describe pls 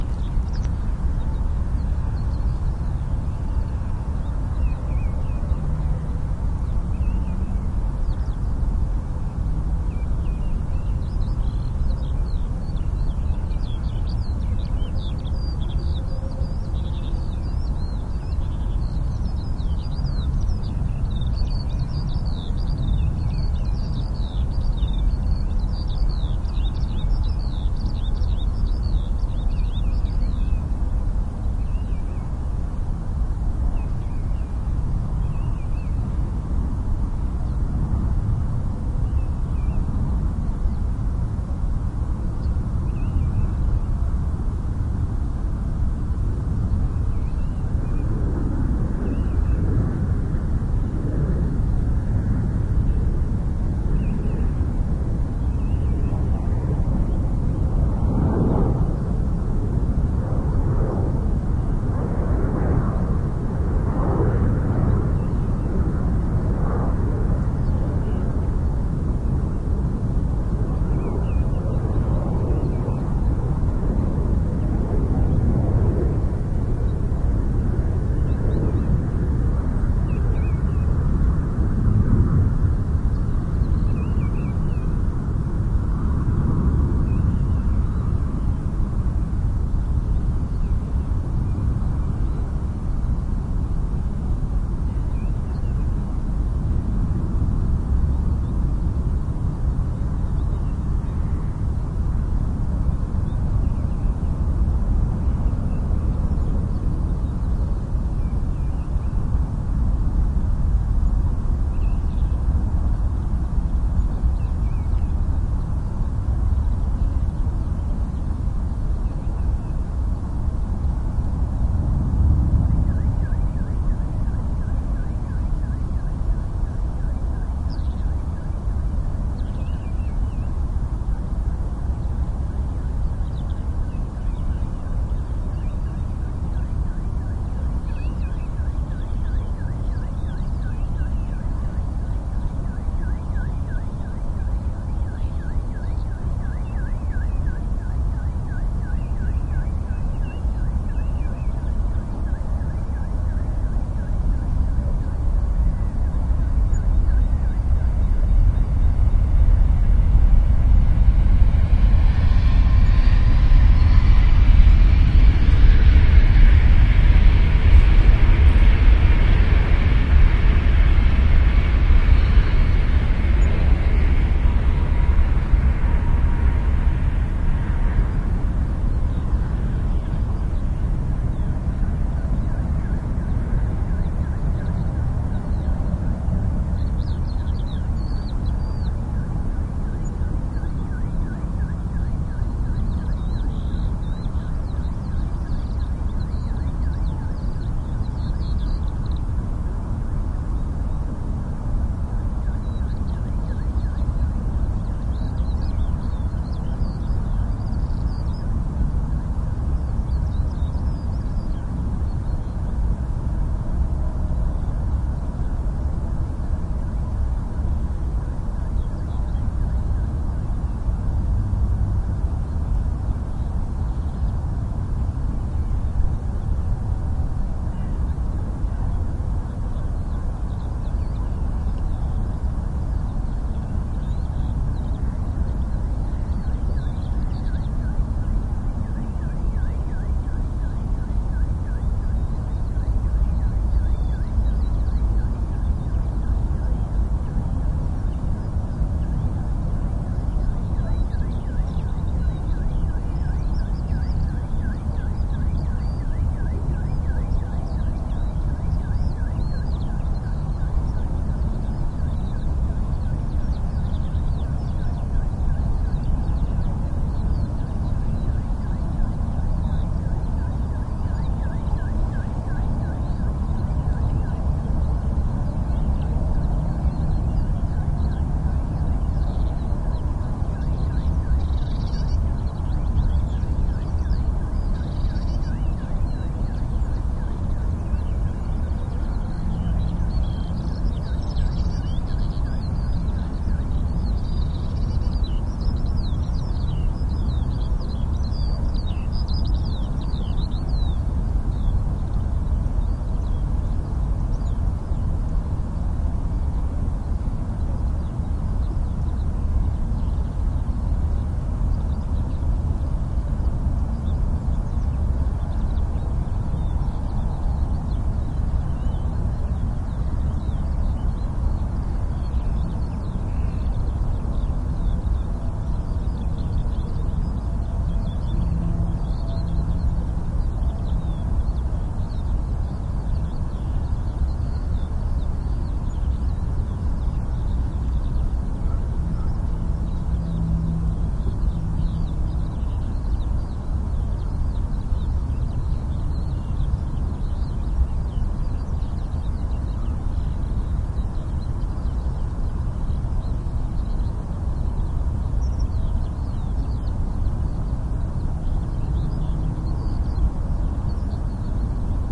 Skylarks and other sounds
The skylarks were back at the end of February!
So of I went to record them on a spot, where I heard them before last
year and what did I get: Sounds from the distant motorway, a plane on
the approach to Hannover airport, an ICE fast train going by and this alarm setting of in the distant..., and, ohh yes, a few skylarks. How many more mistakes are possible on a bit of field-recording? iRiver IHP-120, Fel preamp and panasonic microphone capsuales were used.
field-recording, birdsong, skylark, train, planes, atmosphere, larks